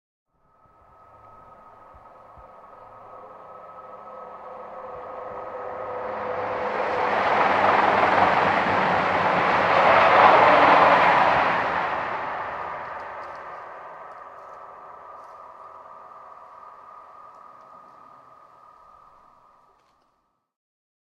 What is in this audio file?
Train passing by forest 1
Recorded on Marantz PMD661 with Rode NTG-2.
Sound of an electric passenger train passing by a small forest in the countryside.
english, countryside, tracks, forest, railway, railroad, electric, field-recording, exterior, train, pass-by